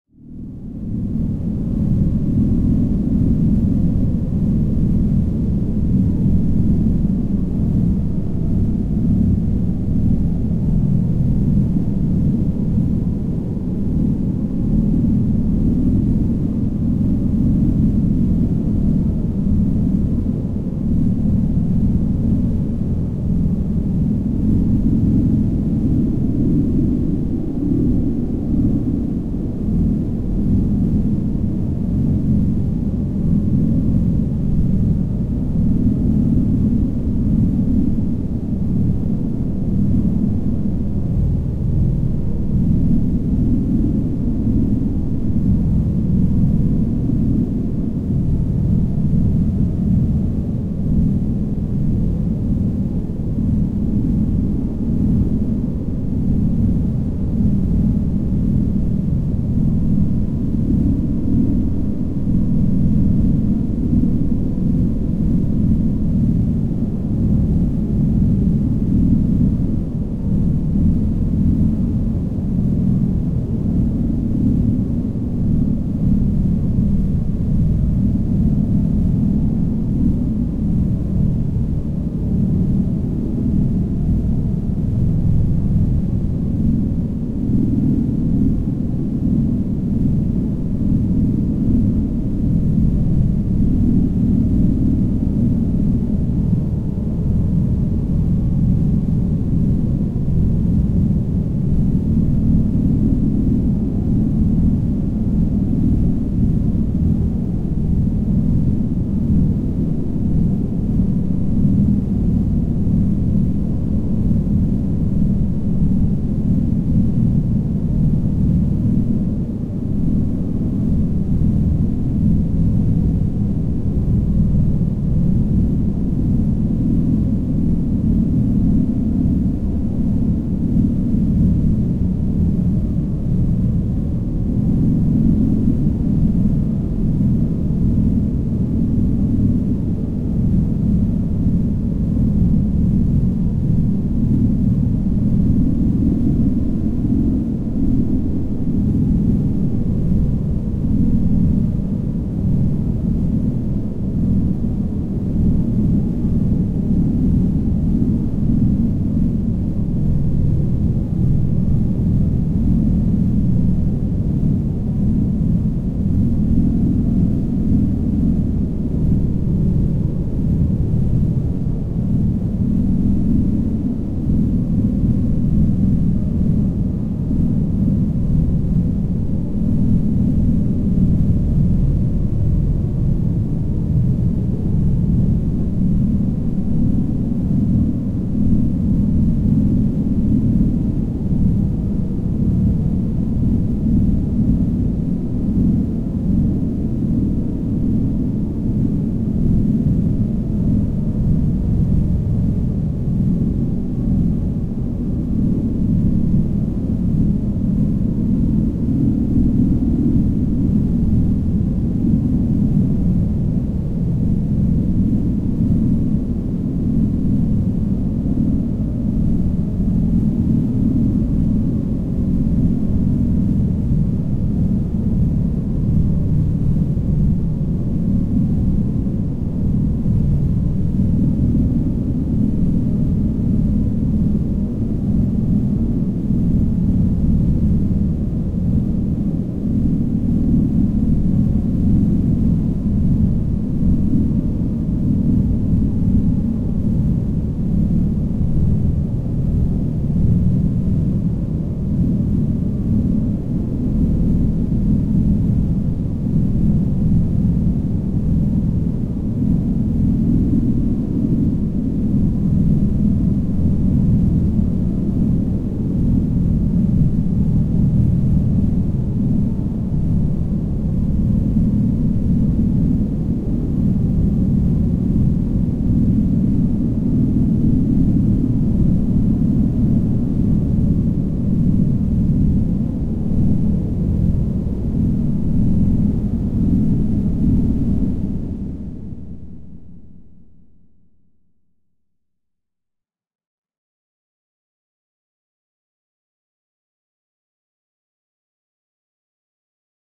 Space Drone 07
This sample is part of the "Space Drone 1" sample pack. 5 minutes of pure ambient space drone. Dark drone with mostly lower frequencies.
ambient, reaktor, space